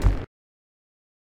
Kick drum. Original source was the flapping wings of a jumping cricket.
kick, kick-drum, cricket